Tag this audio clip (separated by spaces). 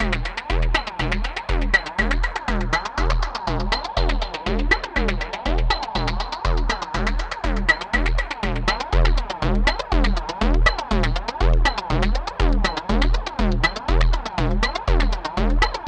120bpm Loop